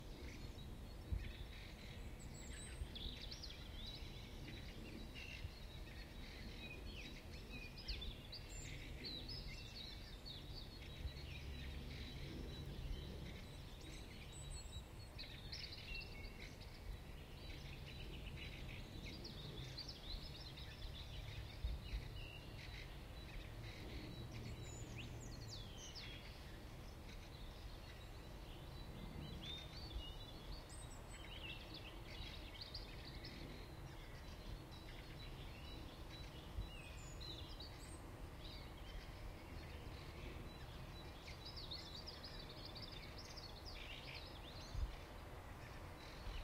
Subdued birds in wooded suburban village near Moscow
Birds here sound quite subdued
birds, Moscow, suburban, village